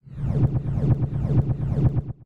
Reversed loop of something with reverb added. Makes me think of a rhythmically skipping CD player.